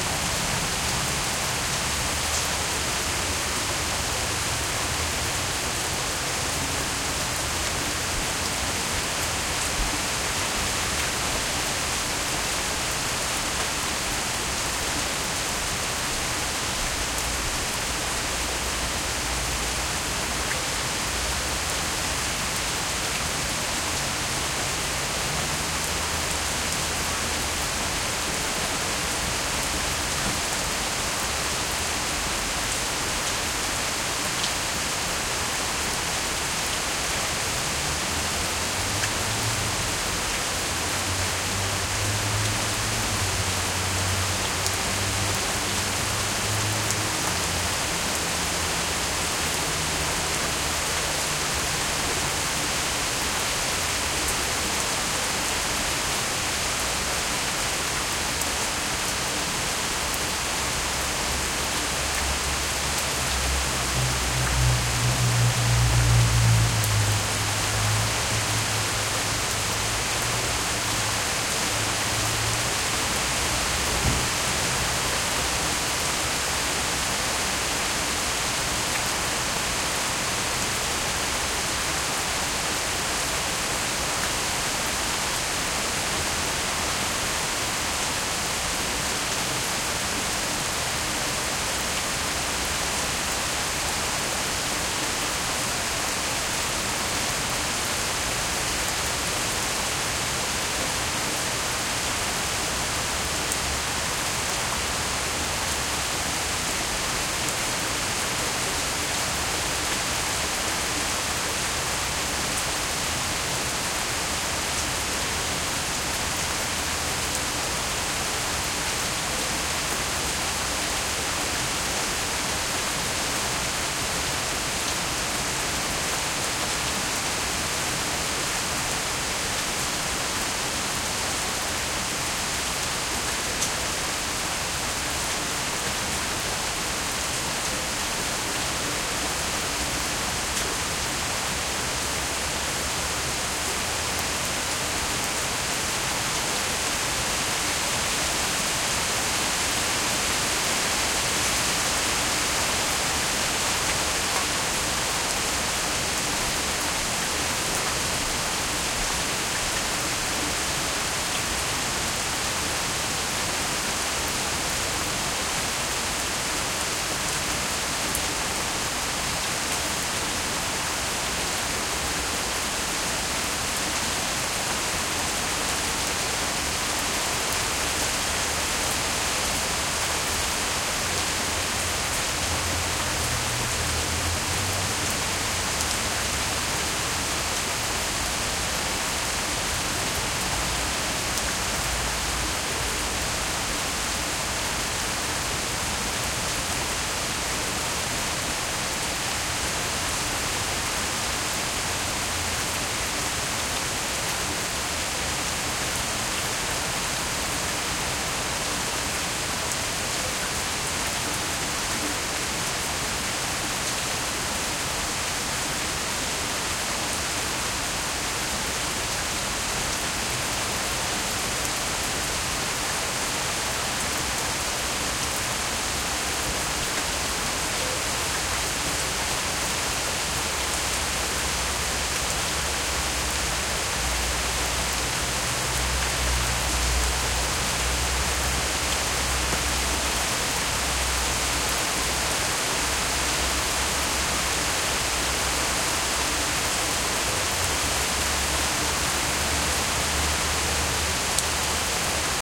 RAIN STREET 5-22-2013
Moderately heavy late night rainstorm recorded 22 May 2013 outside my house in Beaverton, Oregon. Character is general background rain on distant pavement and in trees, with a few close-up dripping and flowing sounds near the mic.
Recorded with Canon T4i DSLR & PolarPro stereo mic. Track stripped out with Quicktime Pro.